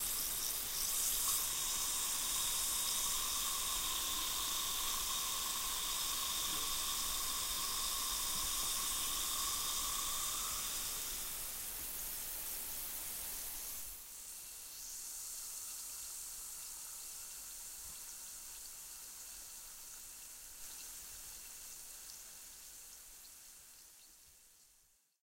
ACC being thrown in water: This is a multi-purpose sound. Created by throwing an ACC tablet into water, clear bubbles can be heard. A strong fizzing sound is very prominent. It sounds like champagne being poured if you would listen to it closely, or a fizzy drink, even champagne. The bubbly sound of this effect gives it it's character. Recorded with the Zoom H6, Rode NTG.
OWI, fizzy, drinks, potion, chemical, carbonated, fizz, bubbles, bubbling, champagne